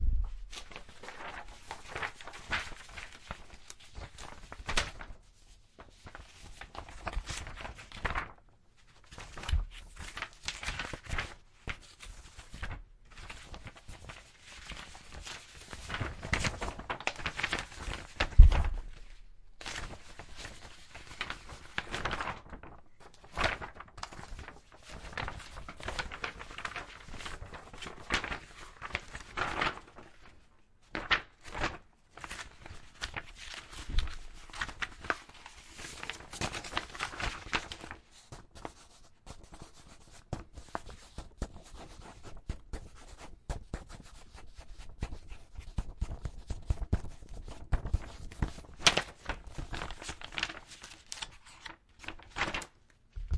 FX Paper moving but not folding
moving leaflets of paper around... pretend to write something on them too for some shi shi shi.